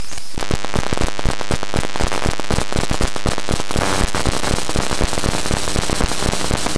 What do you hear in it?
il beat
Really messed up beat made by static type noises.